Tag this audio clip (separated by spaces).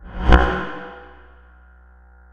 blip fast future ping sfx sound-design sound-effect speed whiz zing zoom